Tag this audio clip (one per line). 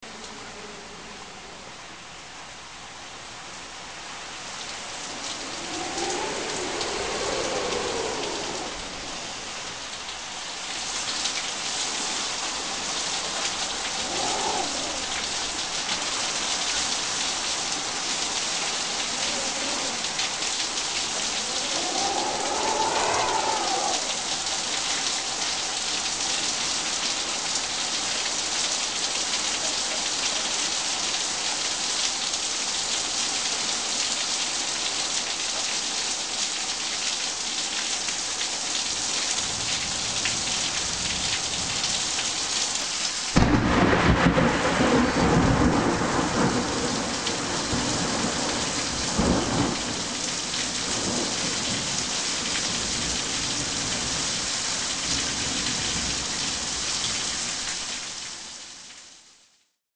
rain
thunder
wind